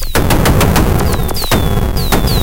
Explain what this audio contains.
20140316 attackloop 120BPM 4 4 Analog 1 Kit ConstructionKit HardWeirdElectronicNoises05
This loop is an element form the mixdown sample proposals 20140316_attackloop_120BPM_4/4_Analog_1_Kit_ConstructionKit_mixdown1 and 20140316_attackloop_120BPM_4/4_Analog_1_Kit_ConstructionKit_mixdown2. It is an hard and weird electronic loop with noises which was created with the Waldorf Attack VST Drum Synth. The kit used was Analog 1 Kit and the loop was created using Cubase 7.5. Various processing tools were used to create some variations as well as mastering using iZotope Ozone 5.
dance, ConstructionKit, electro, percussion, electronic, loop, 120BPM, rhythmic